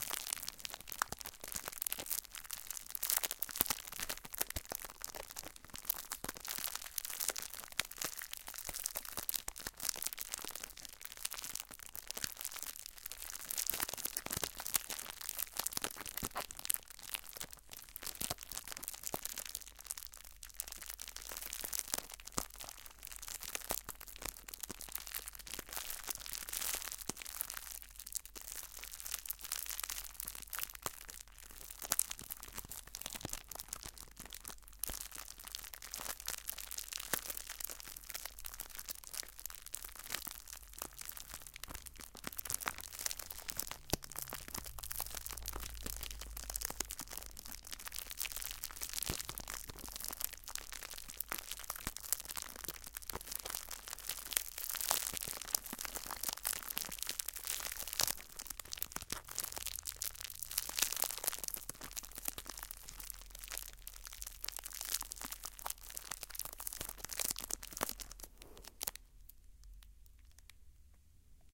Packing Tape Crinkle
Ambient scrunching of packing tape. Stereo Tascam DR-05
asmr, crinkle, crumple, packing-tape, stereo, tape